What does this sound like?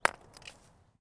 impact-stone
Throwing stone on stone
field-recording, impact, stone